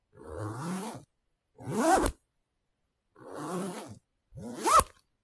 Zip Unzip bigger bag
Unzip and zipping a big zipper on a backpack.
coat, jacket, unzip, unzipping, zip, zipper, zipping